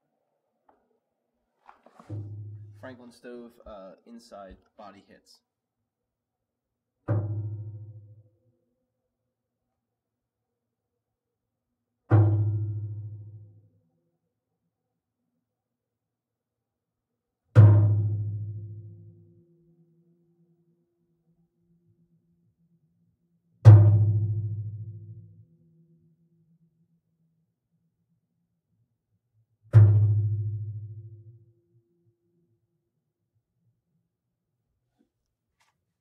Various sounds from VERY old franklin wood burning stove